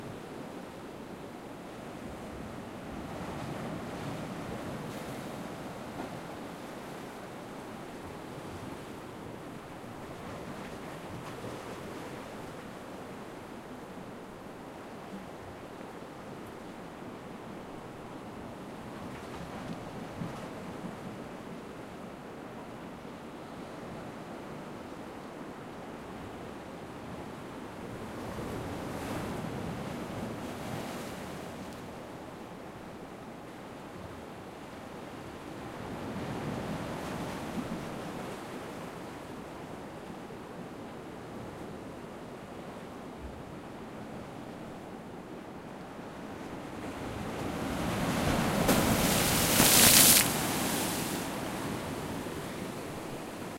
Ola de mar golpeando de frente.
Sea wave frontal hit.